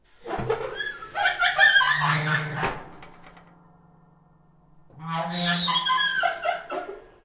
Opening and closing a creaky oven door. Recorded with AT4021 mics into a modified Marantz PMD661.